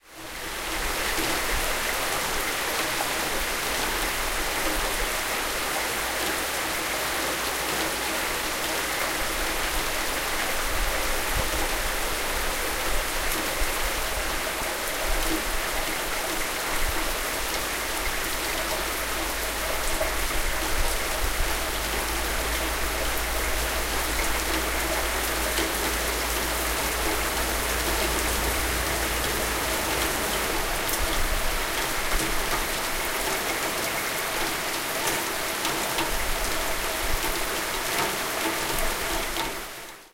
Rain, Moderate, B
Raw audio of moderate rainfall in Callahan, Florida. You can hear water rushing down a nearby plastic drain attached to the side of the house.
An example of how you might credit is by putting this in the description/credits:
The sound was recorded using a "H1 Zoom recorder" on 8th August 2016.
raindrops, moderate, weather, raindrop, rain, raining